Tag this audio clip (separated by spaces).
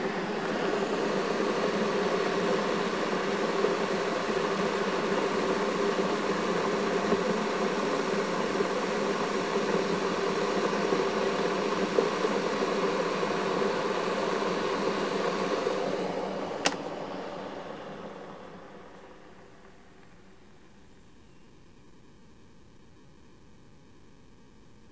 boil hot loud noise steam water